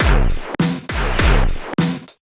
some glitchy experimental things I been working on